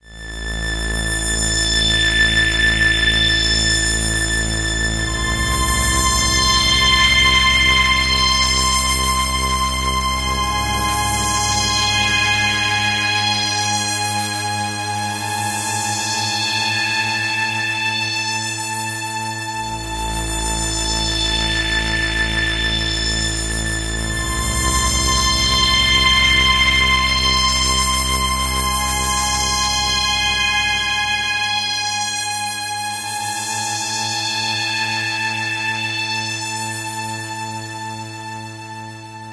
Senatehorrial Halloween 4
Inspired by recent US Senate hearings, here are some scary music fragments just in time for Halloween.
spooky, ghastly, sinister, haunted, cinematic